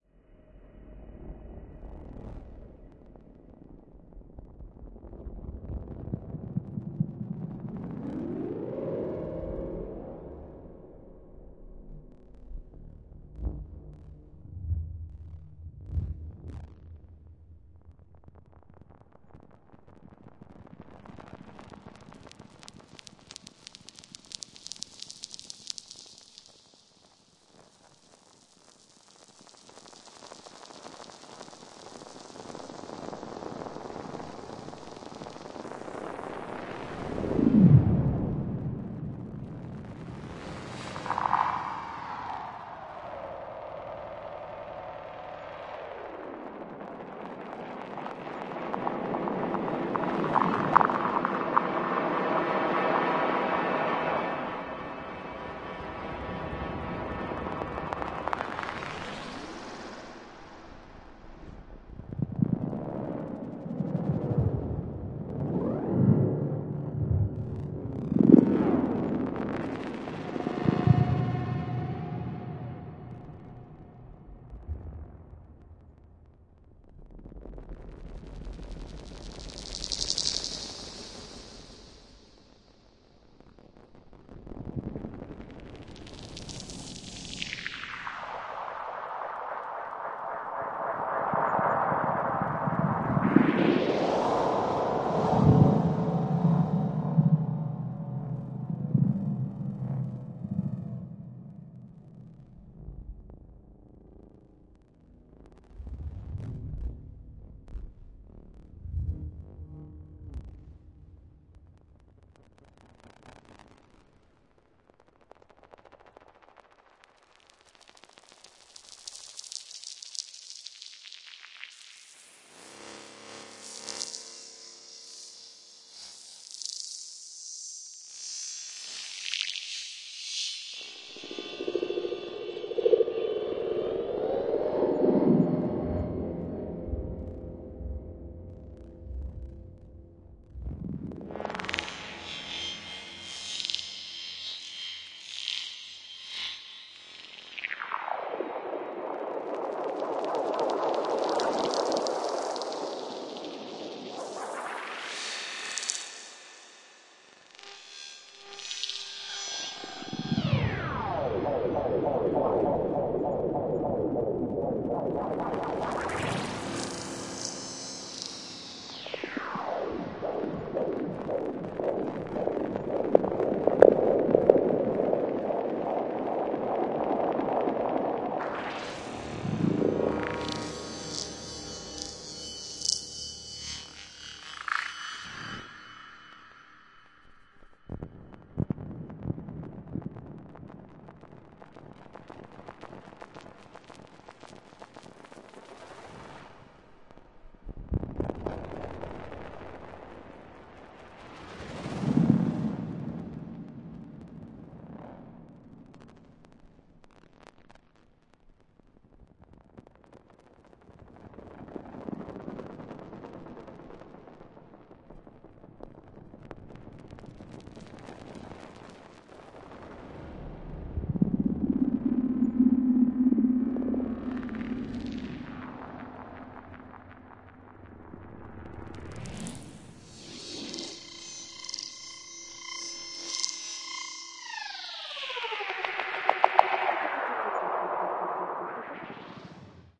ESERBEZE Granular scape 09
This sample is part of the "ESERBEZE Granular scape pack 1" sample pack. 4 minutes of weird granular space ambiance.